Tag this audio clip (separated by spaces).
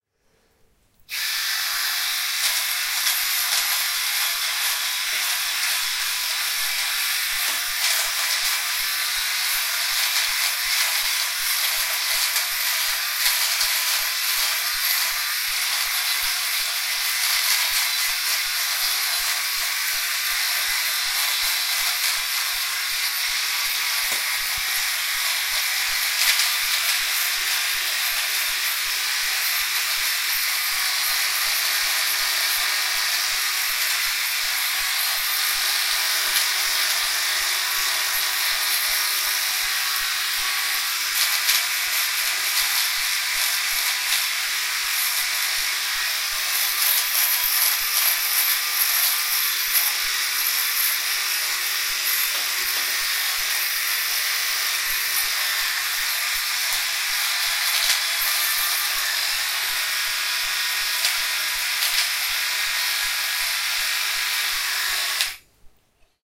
shaver razor electric shaving